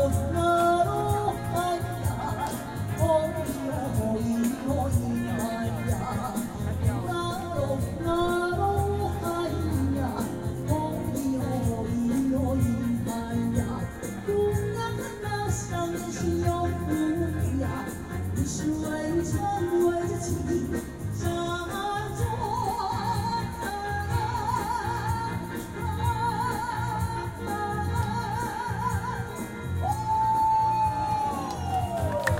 taipei street karaoke
woman singing karaoke in a street in taiwan
city, field-recording, karaoke, people, singing, soundscape, street, voice